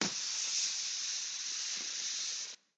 someone slipping in the floor
ground, shoes, slip